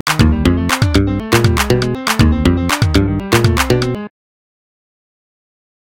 I used a blues base to do this loop, I highly recommend to change the bpm's to achieve a better result
If you use this piece I'd love to see your work!